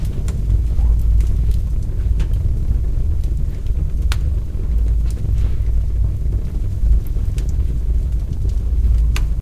large olivetree logs burning, no crackling /troncos de olivo ardiendo, casi no crepitan
house, andalucia, south-spain, nature, field-recording